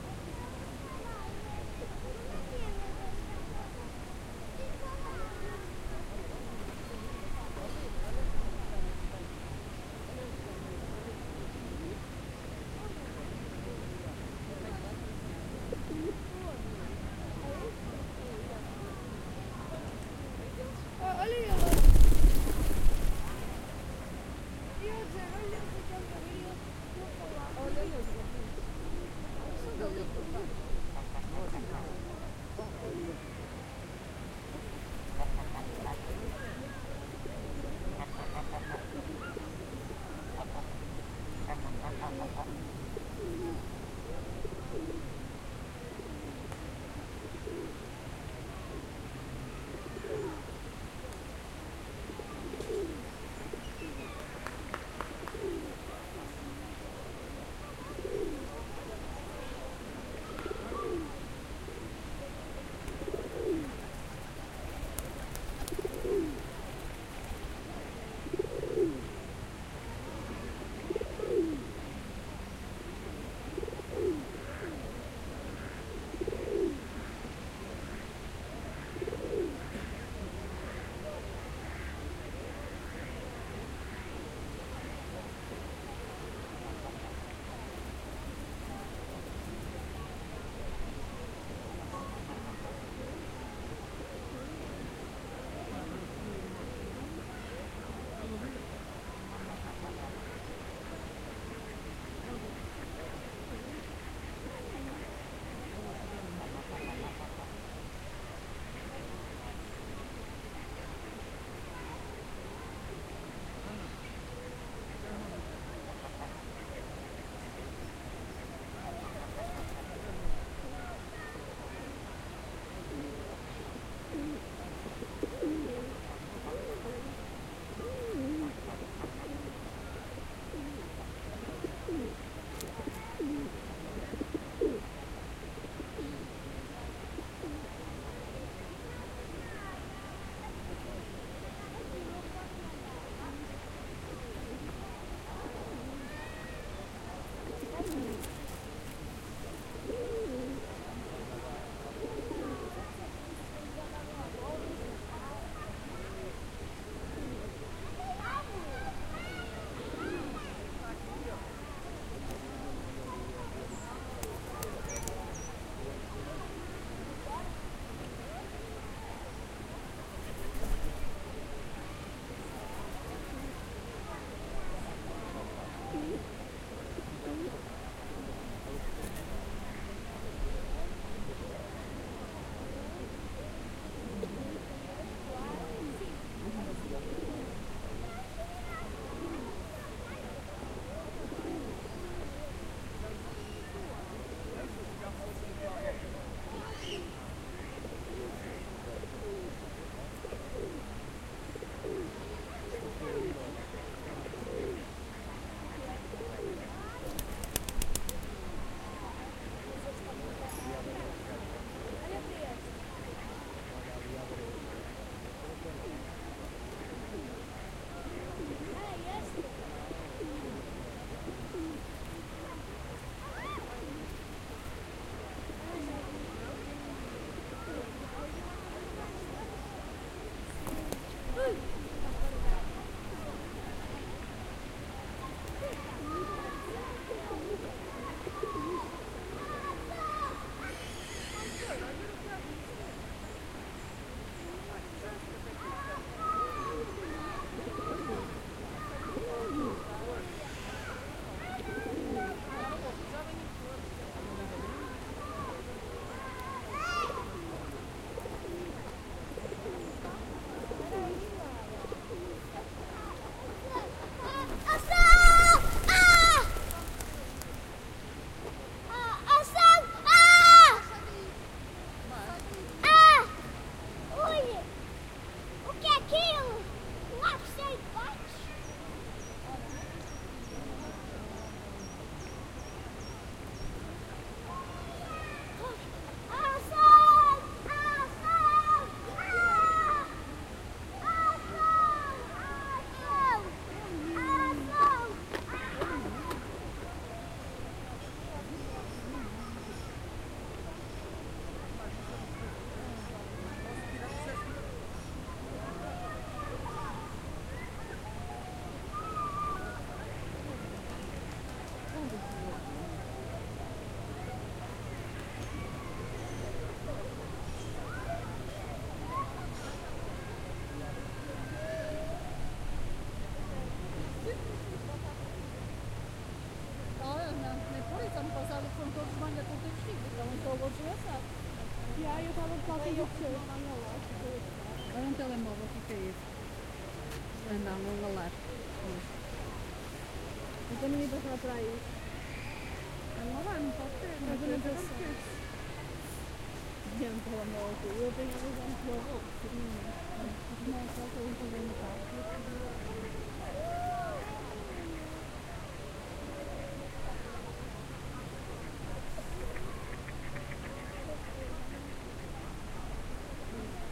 park ambient kids birds
A sunday afternoon in a the park close to Palacio de Cristal in Porto. Recorded near the lake in the middle of the birds and kids playing.
birds, conversation, kids, lake, park, screamingvwings, water